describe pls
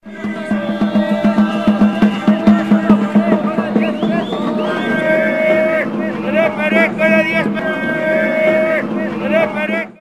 Sounds in the Zocalo (central city square) in Mexico City. 0.5" fade in and fade out, 10" sample.
field-recording, streetsound, mix